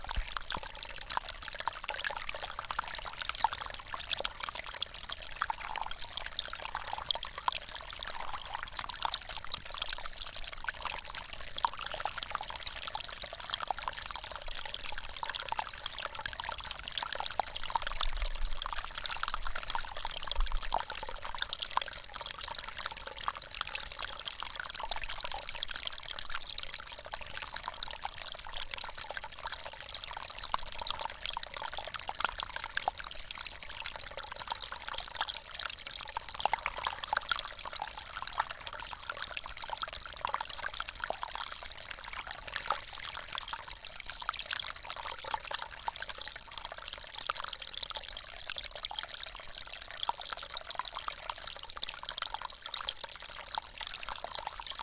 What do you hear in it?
A nice sounding stream found high up in the Goat Rocks Wilderness in Washington. It was flowing from a small patch of snow.Recorded with Zoom H4 on-board mics.